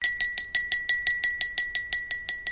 Tapping on a water glass to get attention before a speech perhaps.